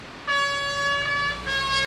washington mono trumpetsnip
Street musician blows his horn recorded with DS-40 as the left microphone mysteriously stopped working and salvaged as a monophonic recording in Wavosaur.
field-recording, monophonic, road-trip, summer, travel, vacation, washington-dc